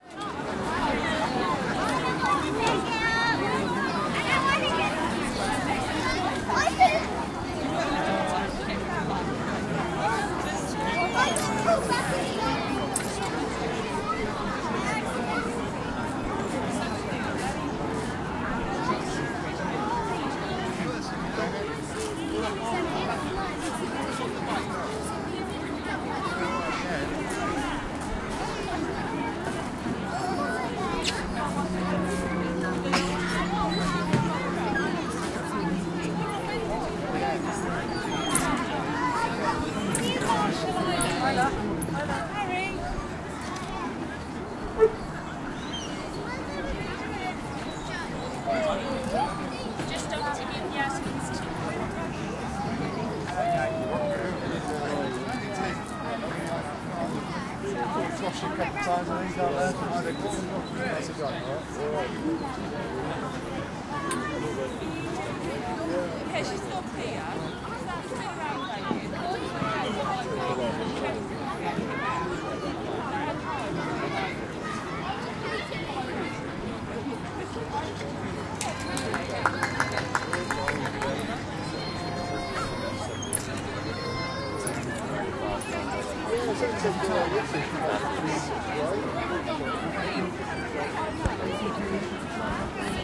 Playground Milton Park 1
Recording of loads of children and adults on a a playground.
Location: Milton Park, Cambridge, UK
Equipment used: Zoom H4 recorder
Date: 24/09/15
playground, children, play, talking, adults, kids